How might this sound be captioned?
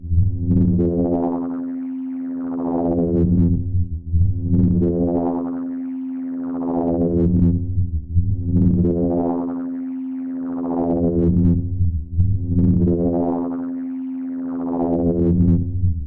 Patch2a 16s mono
Longer version of
This one is just over 16s long and loops seamlessly
filter-FM, kamioooka, loop, loopable, modular, modular-synth, seamless-loop, synth, virtual-modular, VST-modular